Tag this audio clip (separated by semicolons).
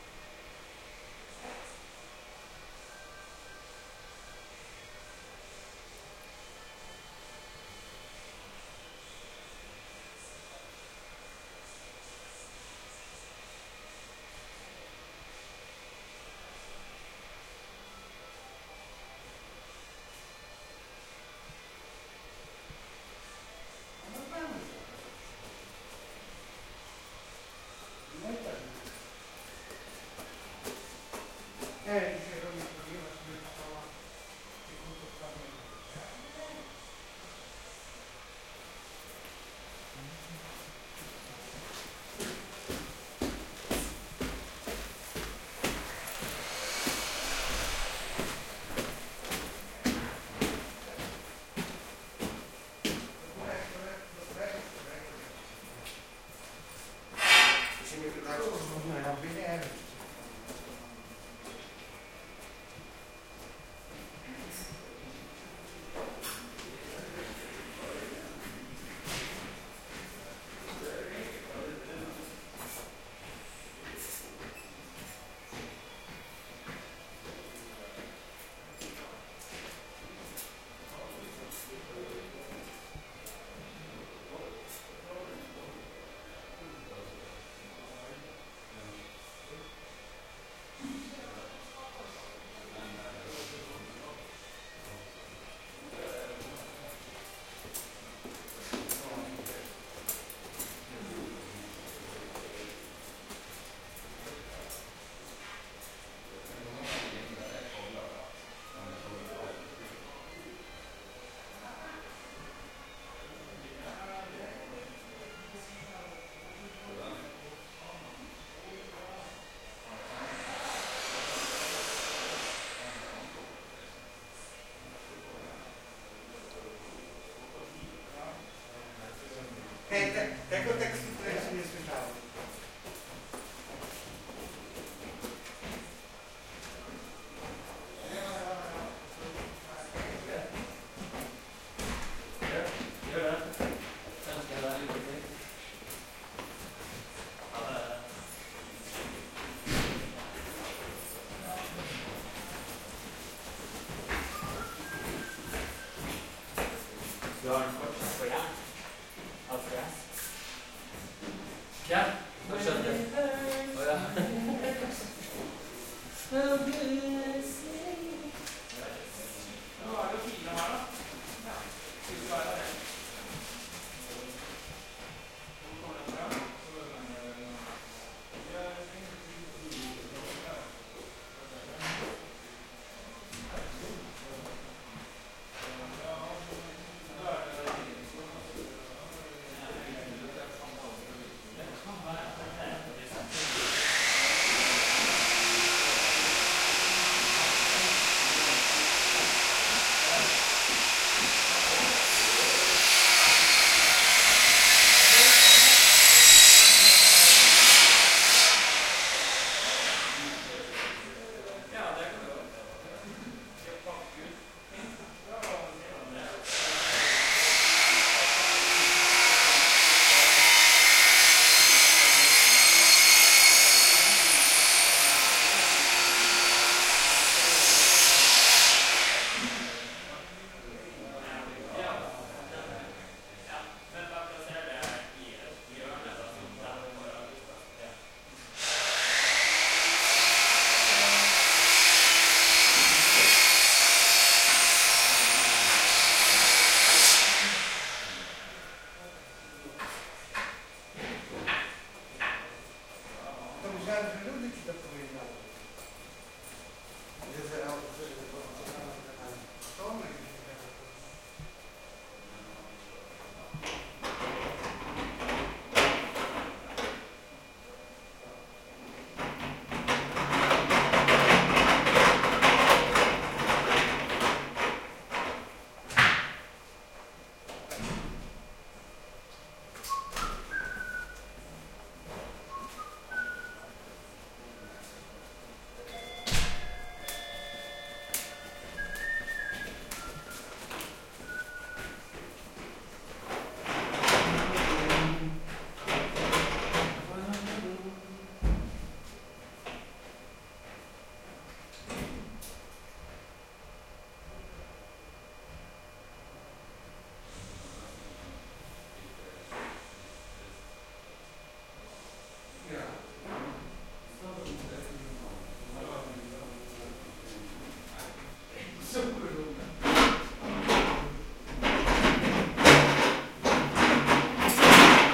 building; field-recording; Norway; carpenters; construction-area; g; atmos; renovating; working; Lofoten; hammering; Norge; Kabelv; constructing; saw; drilling